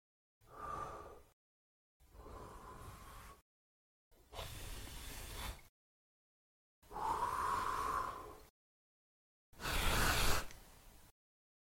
Man Blowing Candle Out
A few takes of a man blowing out a candle.
air, blowing, blowing-out, blow-out, candle, flame, out